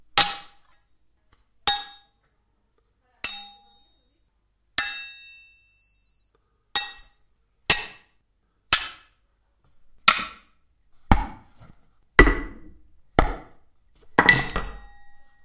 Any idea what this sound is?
Full strike pack
SFX for a game- multi
block, clang, duel, fight, game, hit, impact, knife, metal, metallic, percussion, strike, sword